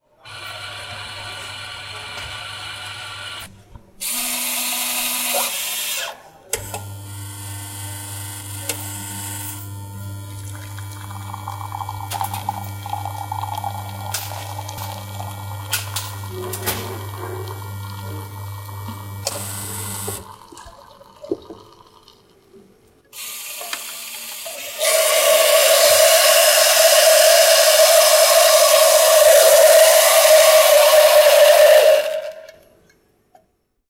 a coffeemachine grinding beans and producing coffee with milkfoam